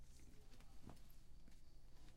51. Viento+ropa
sound, wind